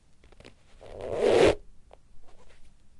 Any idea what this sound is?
zoom H4.
pulling the yoga mat with my hand and letting it slip.
mat, rubber, rubbing, squeak, yoga